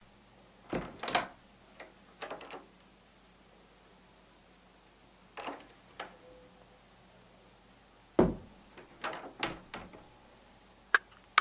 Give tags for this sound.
slowly
wood
door
wooden
doors
open
quick
shut
slow
quickly
opening
closing
close
shutting

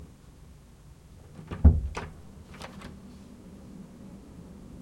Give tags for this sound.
close
door
from
open
position
swings

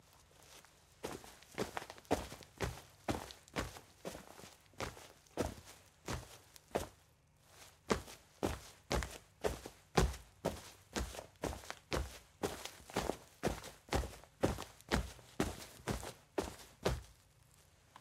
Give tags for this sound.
feet
foley
foot
footstep
footsteps
grass
steps
walk
walking

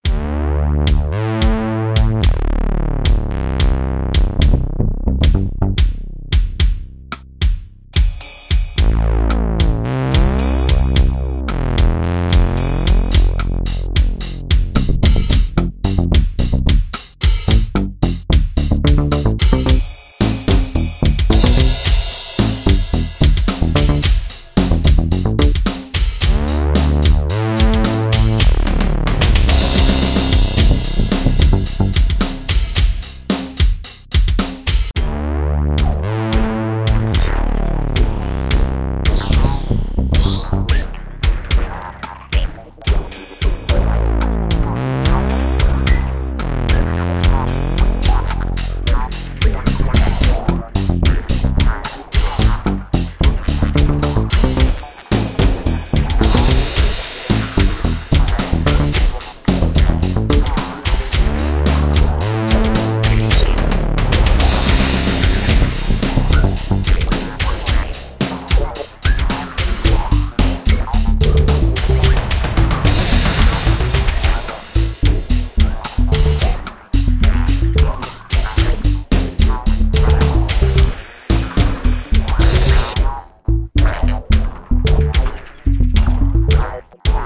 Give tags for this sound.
110
Ballad
Bass
BPM
Drums
Loop
Music
Synth

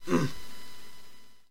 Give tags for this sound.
character
Damage
grunting
inflicted
Ouch
Pain

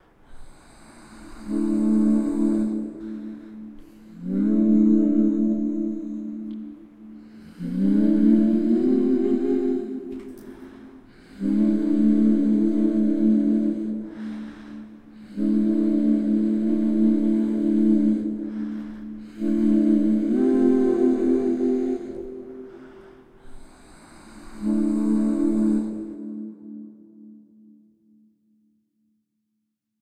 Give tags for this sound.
sample
call
a-capella
harmony
acappella
vocal-sample
train
acapella
a-cappella
male

